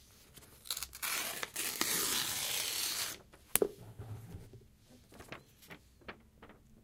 Ripping a page apart
paper, tear, tearing, book, Rip, tearing-paper